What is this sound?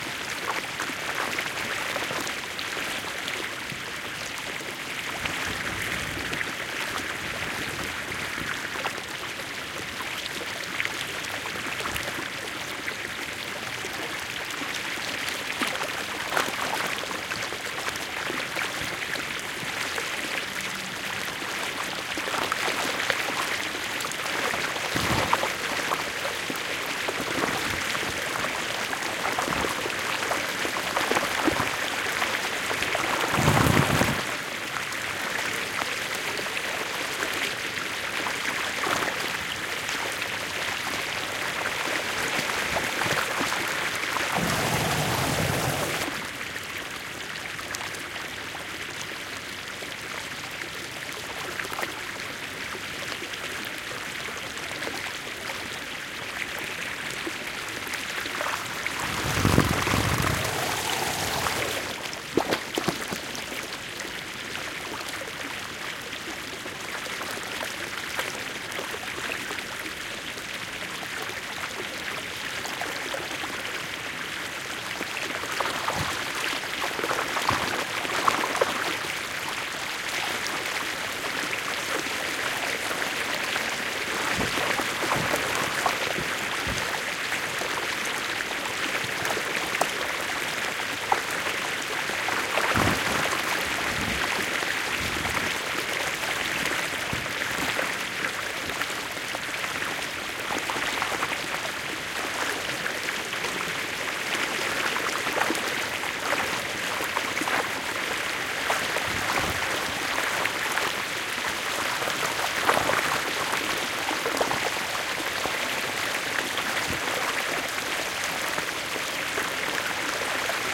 A small River in Basel called "Wiese". Sennheiser MKH 8020 omnis in a SD702 Recorder.